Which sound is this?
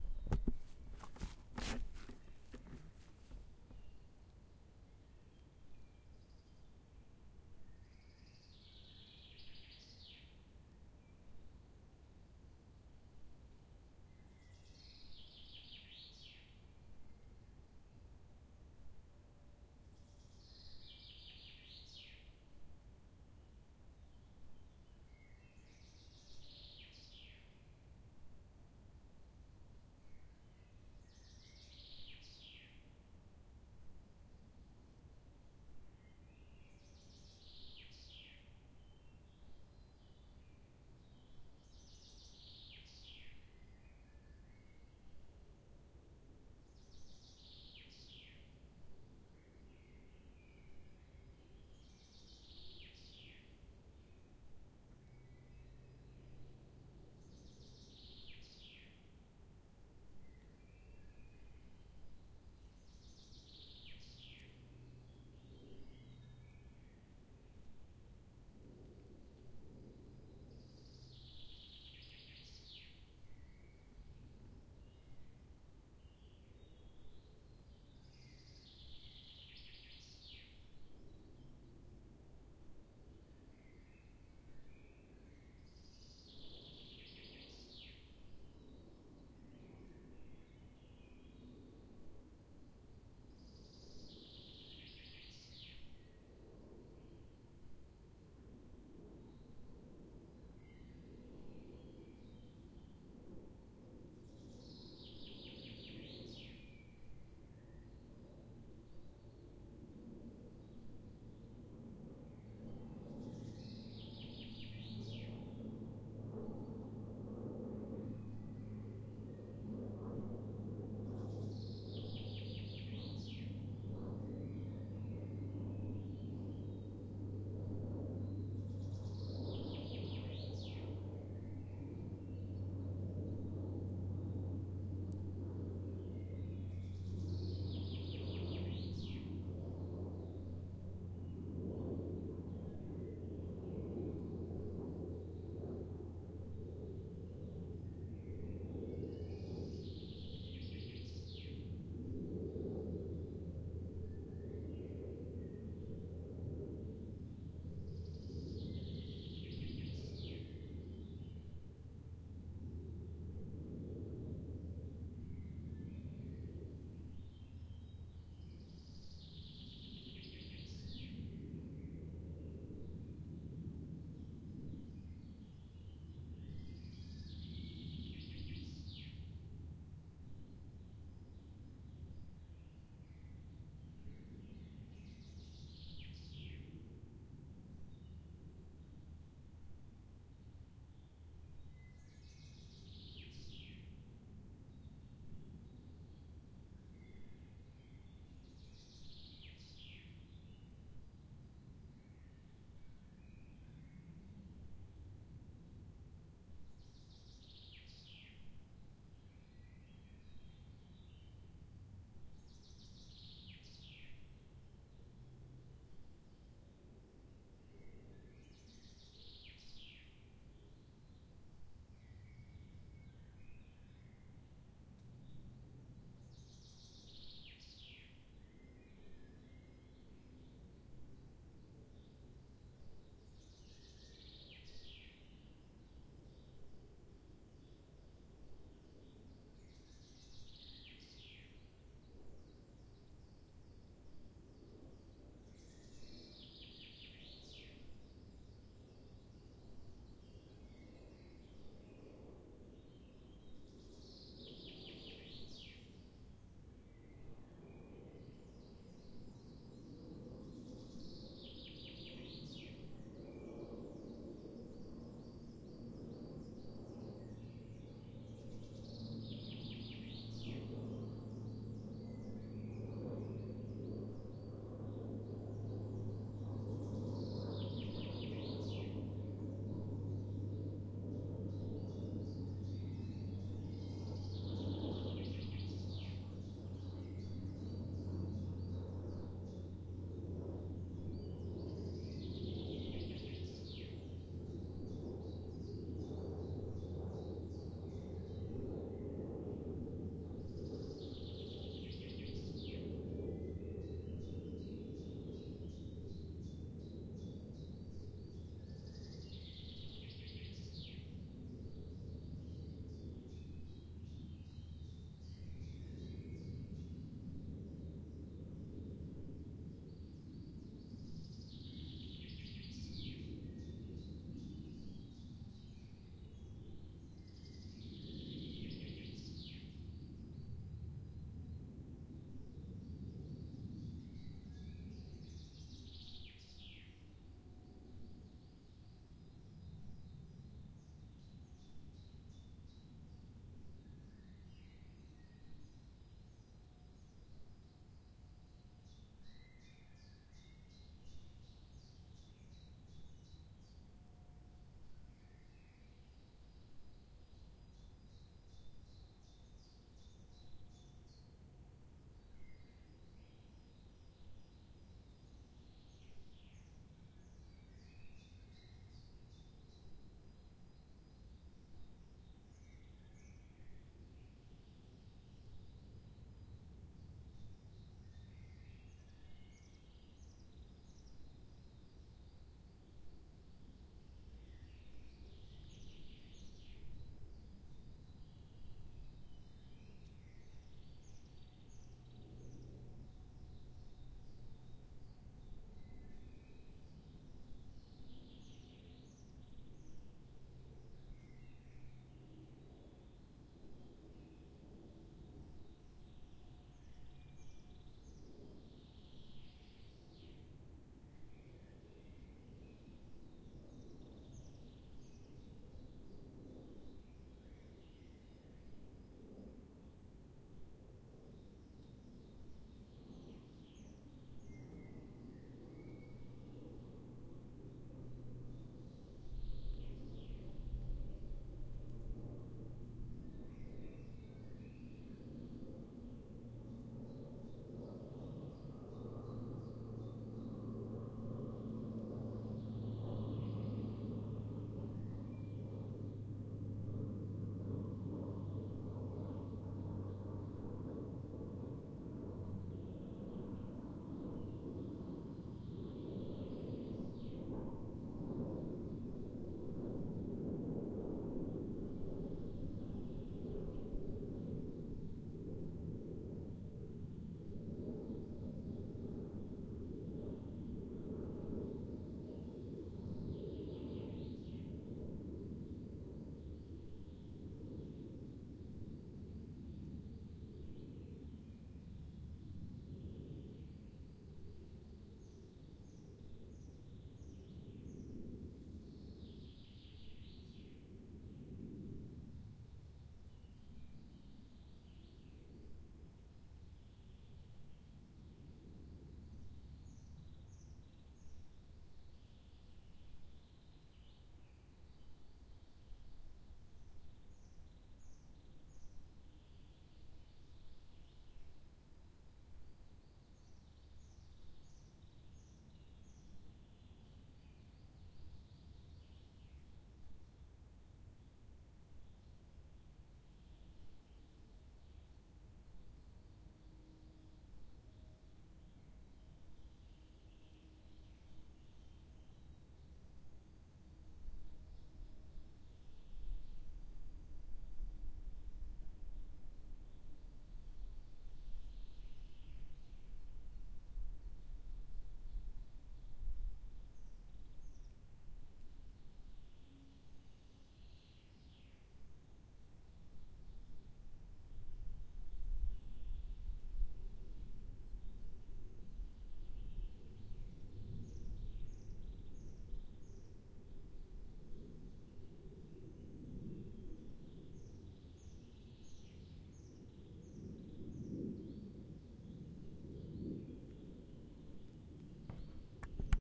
Day forest ambience
The ambience of the forest with birds and airplanes.
Zoom H4 XY mics
forest, birds, airplane, H4, ambince